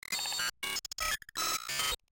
Radio Imaging Element
Sound Design Studio for Animation, GroundBIRD, Sheffield.
radio, wipe, bumper, splitter, sting, bed, imaging